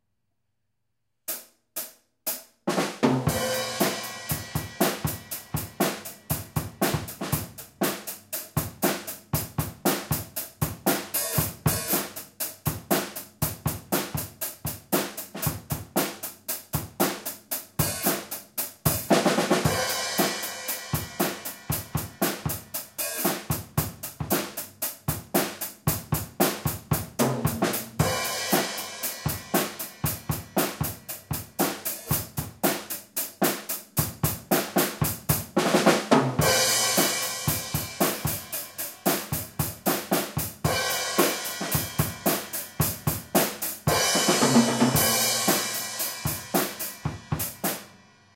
Playing a rock drum beat. Its around 130bpm but I was just freestylin' it without a metronome. Also I mess up a few times, so this is probably more suitable for chopping up than anything else.